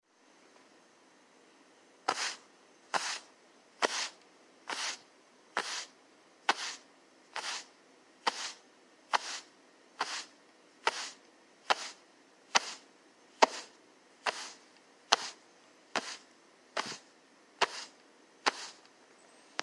sound of rubbing/dragging fabric